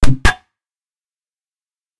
Reinforcing Membrane Clicks
UI sound effect. On an ongoing basis more will be added here
And I'll batch upload here every so often.
Clicks, Reinforcing, Membrane, Third-Octave, UI, SFX